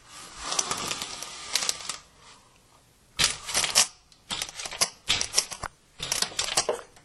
The sound of (effortfully)
raising and lowering typical
window blinds.